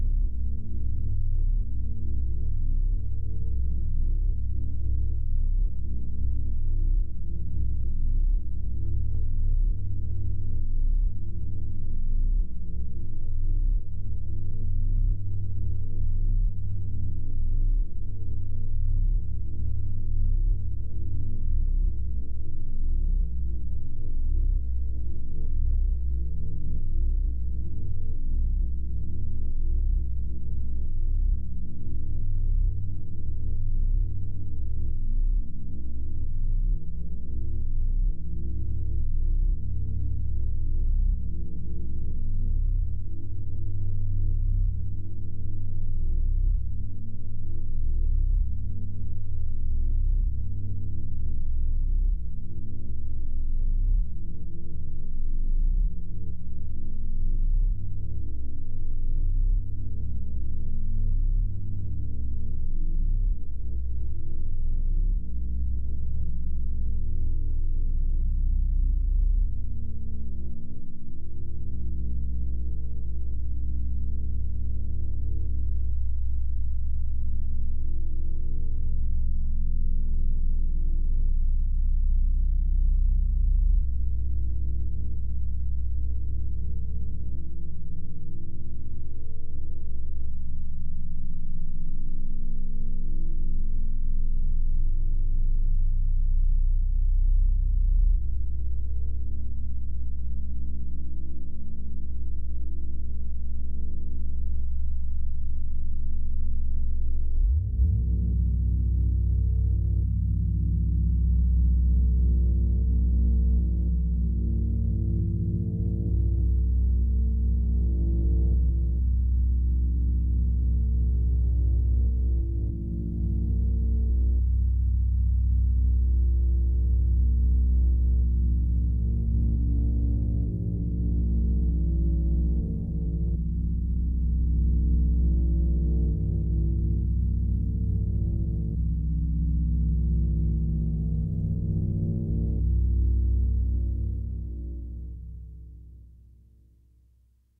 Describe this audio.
sh Machine Room 1
Developed for use as background, low-level sound in science fiction interior scenes. M-Audio Venom synthesizer. Low-frequency hum, cyclical rumble. Changes slowly over time.
futuristic, machine, synthesized